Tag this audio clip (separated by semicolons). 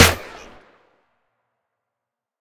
Custom; Alien; Rhythm; Kick; Area; NovaSound; Hat; Drum; Reason; FX; Loop; Sound; Propellerheads; Space; 51; Nova; Hi; Snare